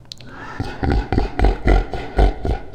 Creature Laugh
Here, I recorded myself laughing de-tuned it and added a reverb in Ableton.
creature, evil, laugh